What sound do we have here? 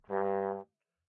One-shot from Versilian Studios Chamber Orchestra 2: Community Edition sampling project.
Instrument family: Brass
Instrument: OldTrombone
Articulation: short
Note: G1
Midi note: 32
Room type: Band Rehearsal Space
Microphone: 2x SM-57 spaced pair
brass, g1, midi-note-32, multisample, oldtrombone, short, single-note, vsco-2